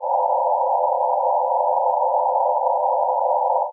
Random Sound created with SuperCollider. Reminds me of sounds in ComputerGames or SciFi-Films, opening doors, beaming something...
supercollider, ambience, atmosphere, ambient, sound, horn, electronic, sci-fi